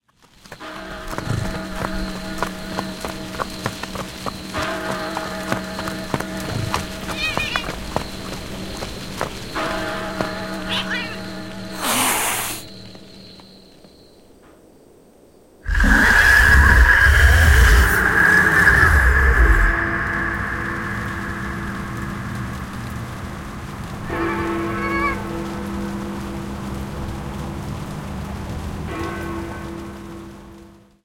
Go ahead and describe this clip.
Evil Horse
A horse in London is actually an alien monster
monster, horse, big-ben